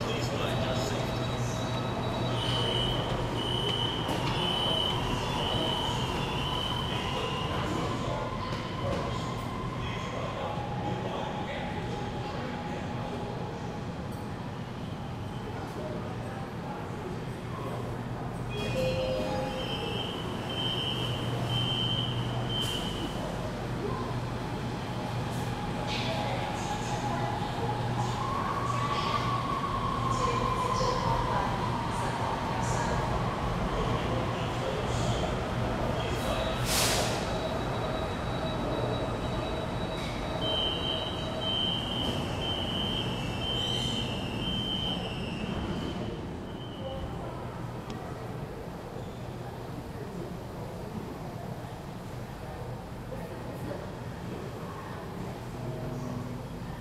city noise subway station
Some city noise recorded in a not very busy subway station in Shenzhen, China.
automatic-door, broadcast, city-noise, field-recording, subway, train